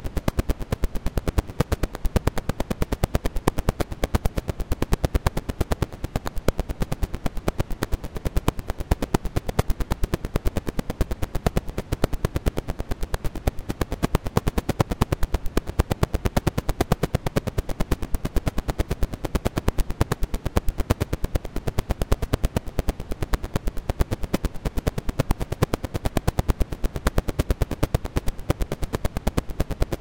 A record crackle I built in Audacity. The year and rpm are in the file name.